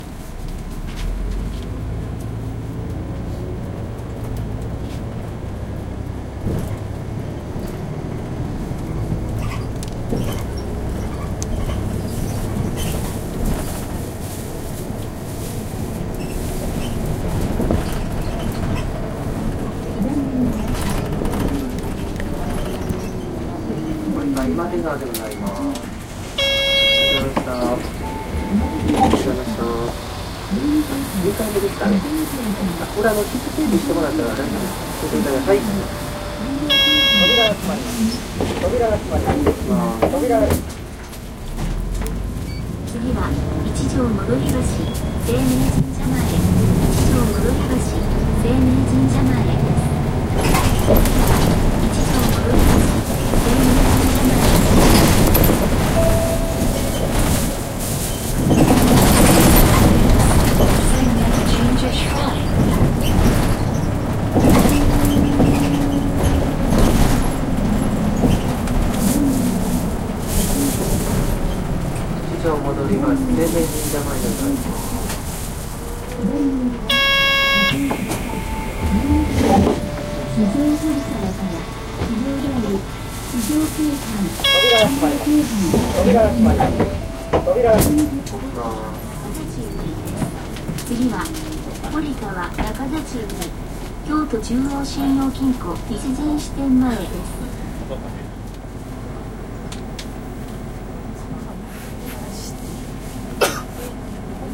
Japan Kyoto Busride
In Kyoto, November 2016. A bus ride. No talking people. A heavy, rumbling, shaking bus ride. Speaker announcements can be heard as well.
Recorded with Zoom H2N Handy recorder in MS Stereo.